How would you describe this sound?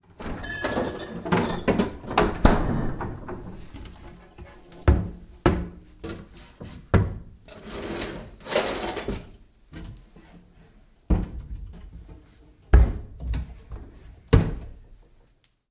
Opening the various things in a kitchen